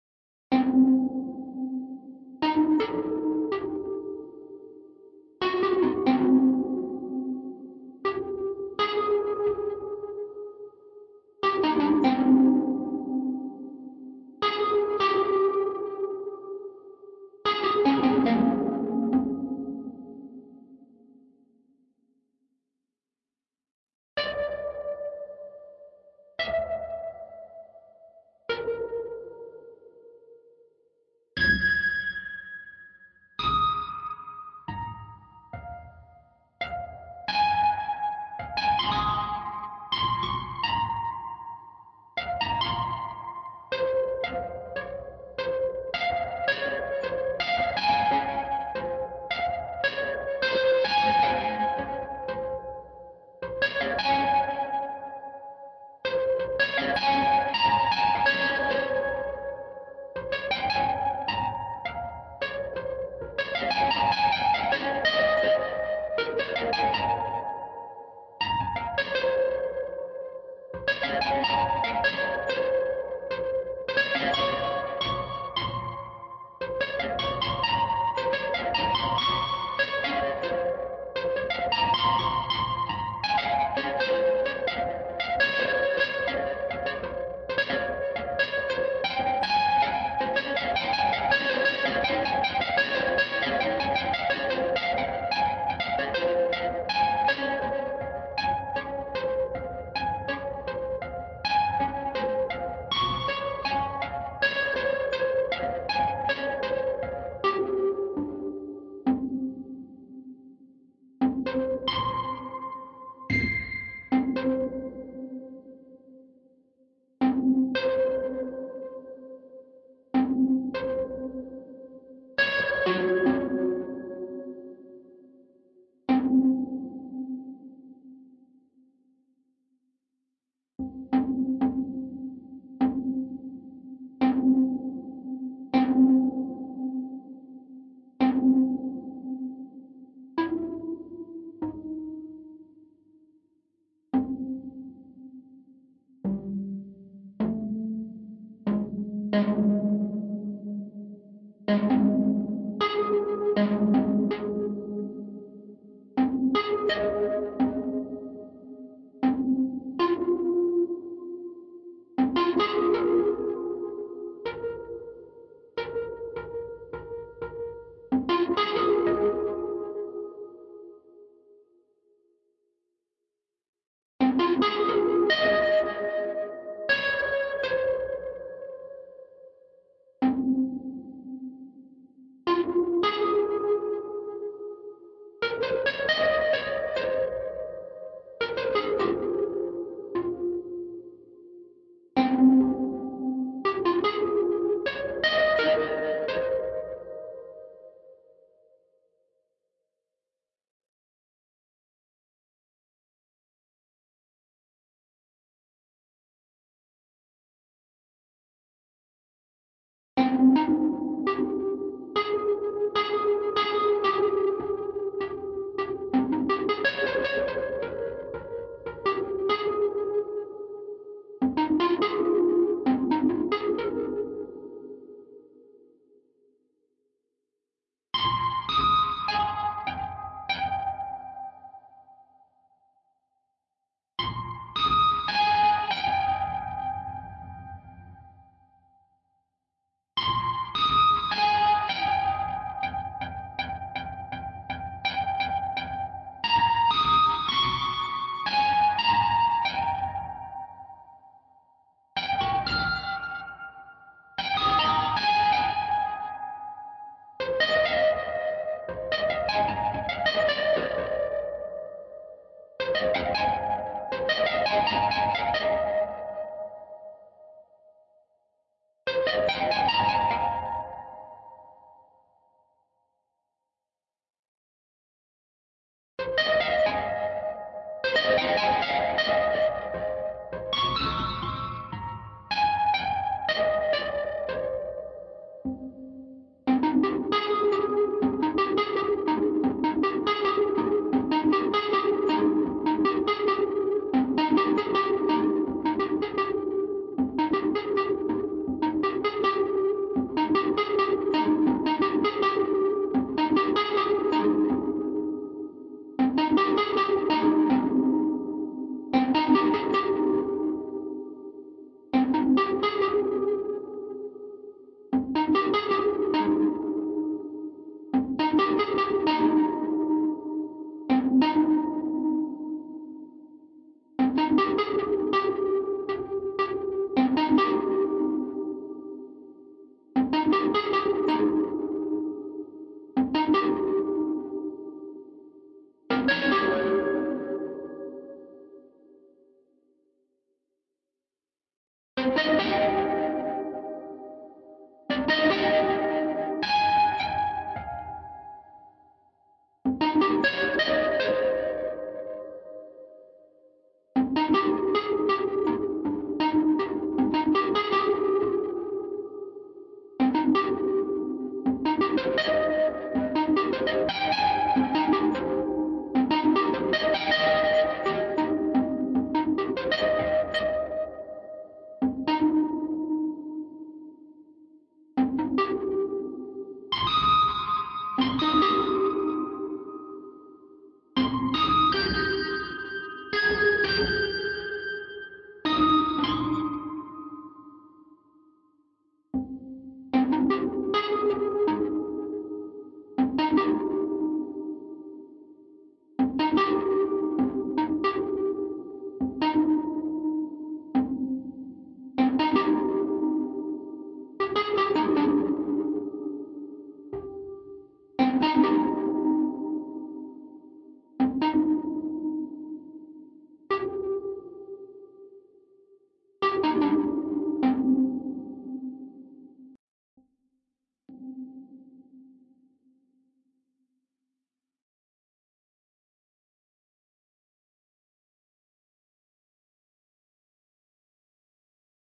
Synth Sounds Ambiance Unedited
This sound was apart of the creation of this sound located here.
As I have progressed through sound creation I have learned that its really hard to come up with the perfect sound.
I've spent many hours, so many hours of my life to just come up with one sound, resampling and resampling over and over again.
It is alot of brain damage to find which sound fits perfectly well with what.
This sound is unedited and just recorded separately from a drum beat.
I was slightly applying effects and EQ to this sound and just trying to find a proper rhythm.
By no means am I rhythmically inclined in this but in the end I think I kind of got the hang of it from the link above.
Guess it goes to show that you just gotta keep trying even if it feels like you ain't getting anywhere.
prioritized, Everything, Drums, electronic, ambient, loop, effect, atmospheric, ambiance, else, dance, bass, beats, Loops, Experimental, electronica, looping, Rhythms